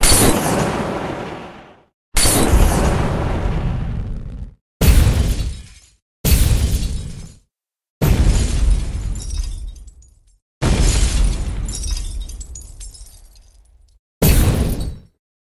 Molotov Cocktail/Fiery Explosion
Some sounds I produced for a game I've been developing for years. I often create dozens of options before I choose one, so I figured I'd share some of them here. Hope you find some use out of them!
Thanks to kinrage95 for the use of his "Molotov" sound effect which I used heavily and combined with a variety of other sounds. Here's a link to that sound effect:
fiery-bang, fiery-explosions, fiery-explosion, molotov-cocktail-hit, cardassian-bullshit, molotov-cocktail-explosion, fiery-impact, fiery-blast, fiery-boom, molotov, molotov-cocktail, molotov-cocktail-impact, molotov-blast, fiery-nova